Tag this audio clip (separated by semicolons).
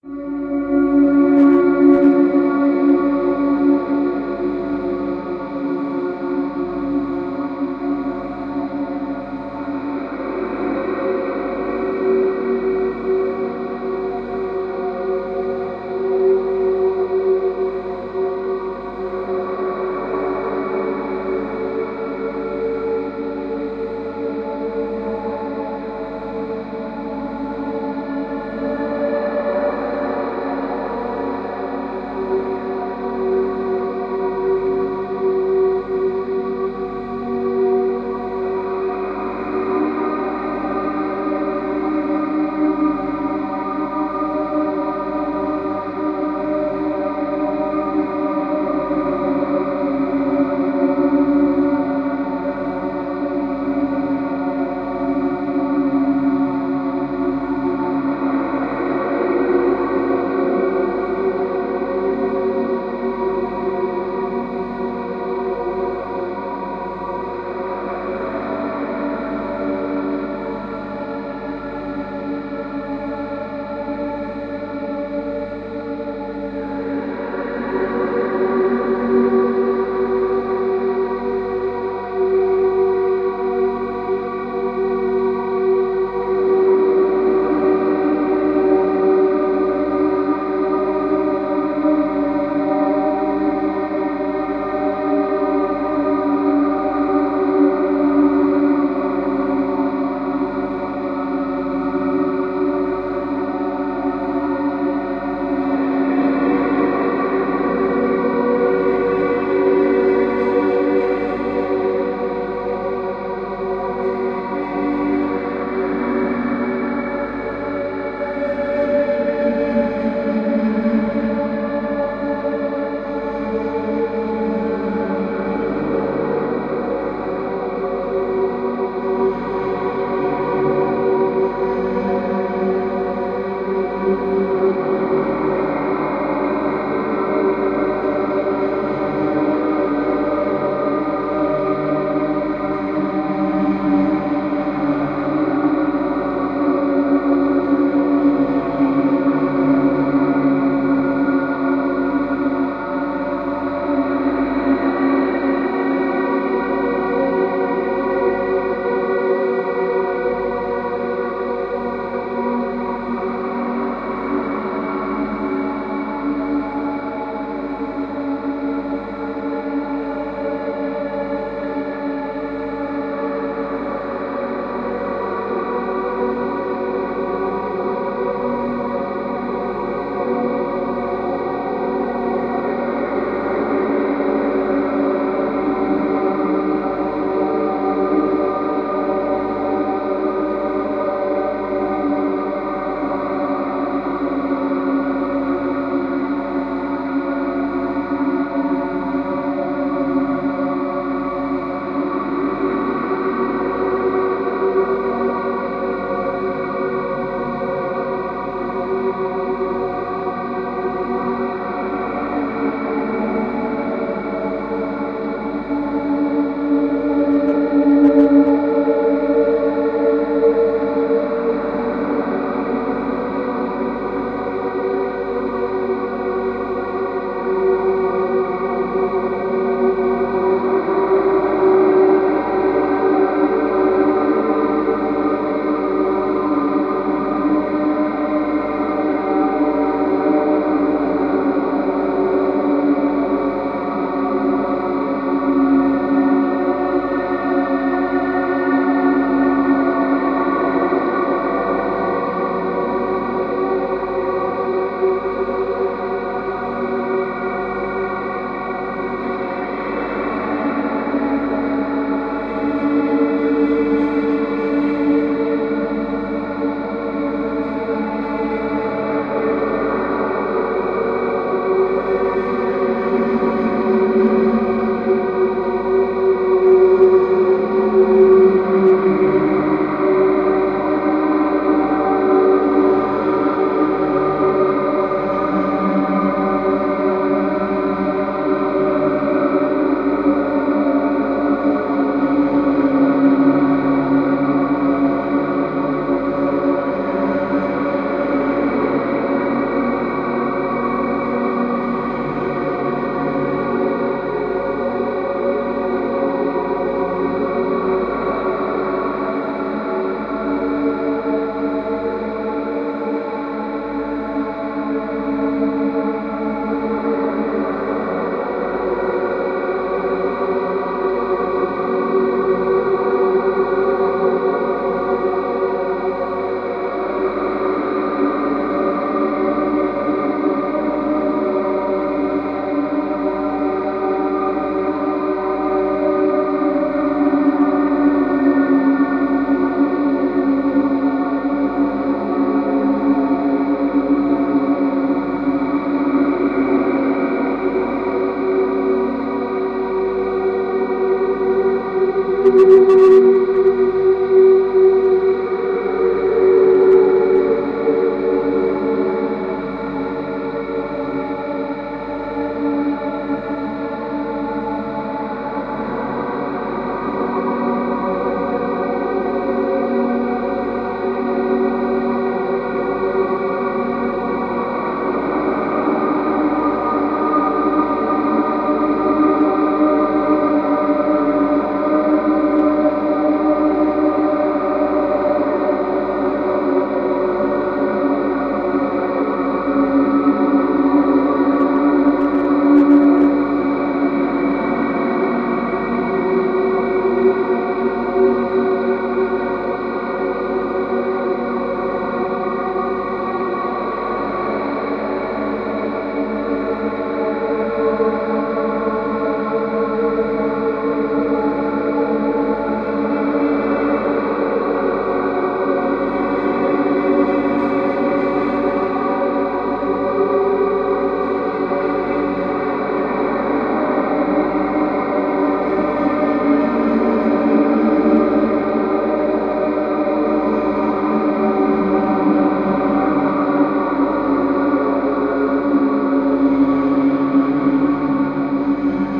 soundscape; guitar; drone; evolving; space; dream; psychedelic; ambient